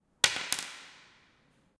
Sound of a crayon falling on a table in a classroom at UPF Communication Campus in Barcelona.